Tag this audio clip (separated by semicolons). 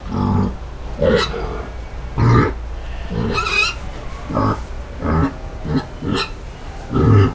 farm; field-recording; pig